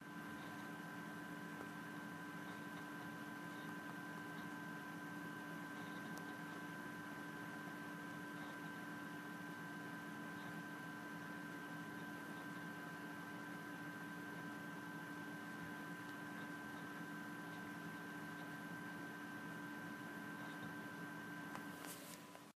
Computer Hum
The hum of a PC desktop.
buzzing, appliances, whirring, whir, machine, buzz, hum, field-recording, computer, mechanical, PC, droning